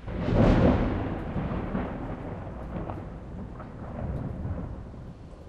storm hit
real storm recorded in stereo this is one separated hit, H4zoom
hit, light, storm